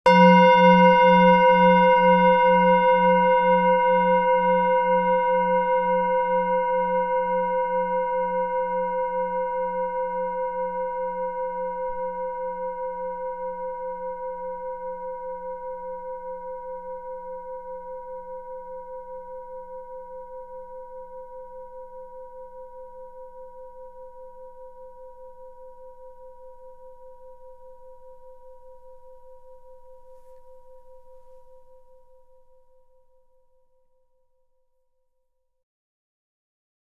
singing bowl - single strike 7
singing bowl
single strike with an soft mallet
Main Frequency's:
182Hz (F#3)
519Hz (C5)
967Hz (B5)
Zoom-H4n, mic-90